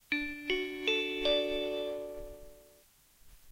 Schoolbell (from german high school)
This is a schoolbell from a german high school, recorded with a PHILIPS SBC-ME 570 and Audacity